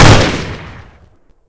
Layered Gunshot 2
One of 10 layered gunshots in this pack.